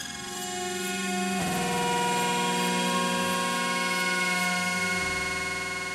string synth ascending four note cluster
shaker on odd 8th notes
equipment used: